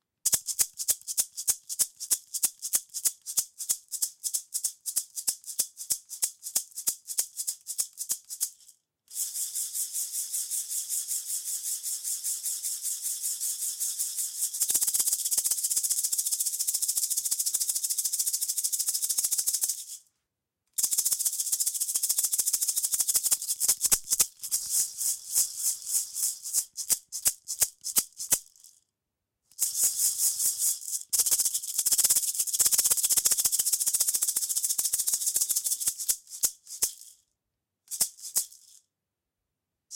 A large bamboo maraca